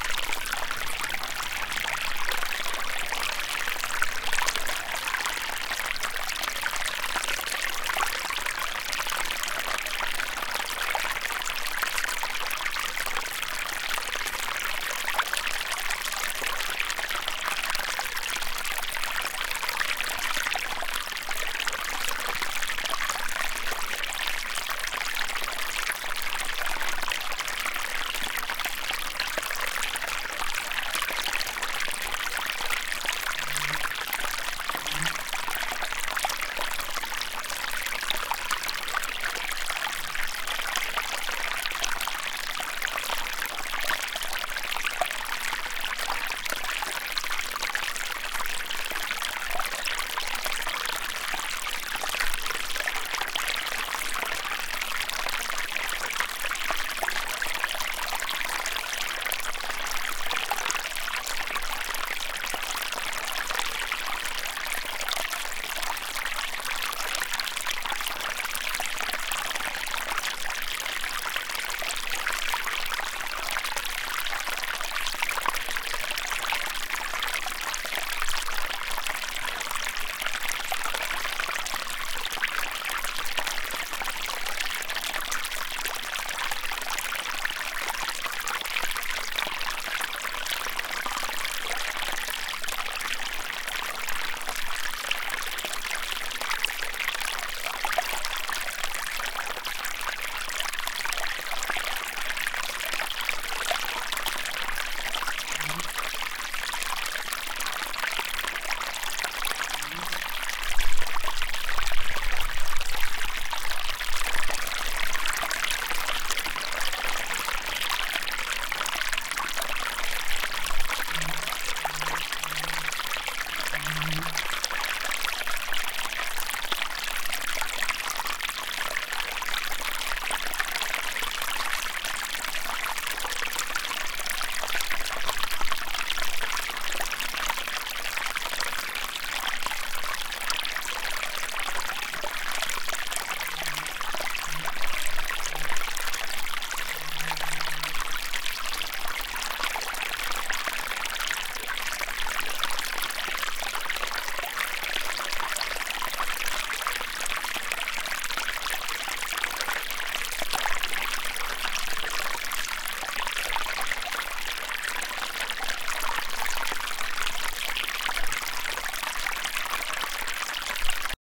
broken top creek 09
One in a series of small streams I recorded while backpacking for a few days around a volcano known as Broken Top in central Oregon. Each one has a somewhat unique character and came from small un-named streams or creeks, so the filename is simply organizational. There has been minimal editing, only some cuts to remove handling noise or wind. Recorded with an AT4021 mic into a modified Marantz PMD 661.